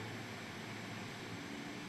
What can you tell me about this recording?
Radio static from FM stations.